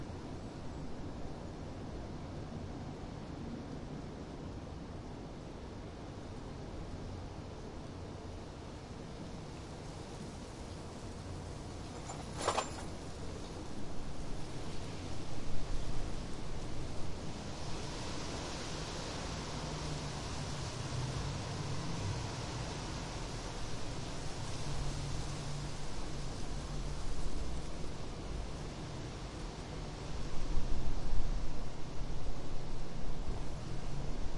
Windy autumn - bicycle going down the curb
A bicycle going down the curb on a windy autumn day.
autumn, pavement, wind, curb, bicycle, bike, windy